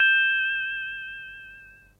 Vibrato Chime C
Programmed into Casio CT 1000p Vintage Synth
1000p, Casio, CT, Synth, Vintage